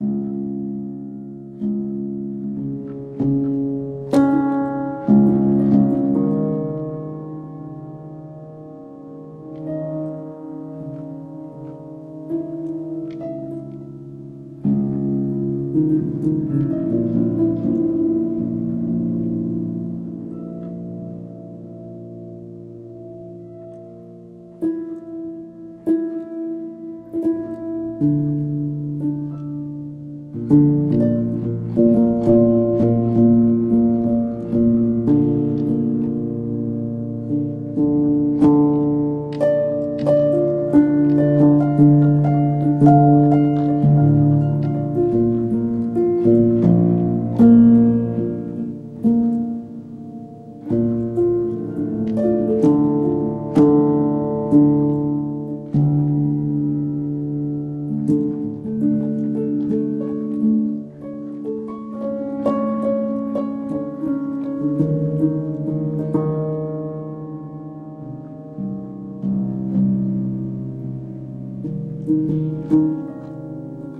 Dark Night - Piano Sad Mood Drama Slow Chill Thriller Cinematic Experimental Modern Atmo Music Surround
Thriller, Drama, Film, Surround, Keys, Experimental, Movie, Atmosphere, Mood, Night, Modern, Chill, Slow, Cinematic, Piano, Horror, Sad, Music, Dark, Ambient, Atmo, Ambience